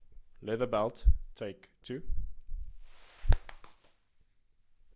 180081 Leather Belt 01
Taking a leather belt out of the loops of a jean.
Belt, Jean, Leather, OWI, Removing, Slapping